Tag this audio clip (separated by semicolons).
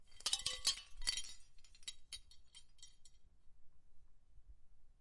crispy; shards; shells; tinkling